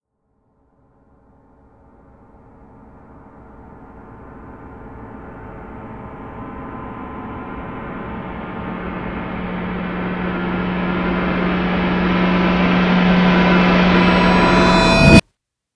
tension build
A sound created for a dramatic build in tension. Created by reversing and layering a number of percussion sounds.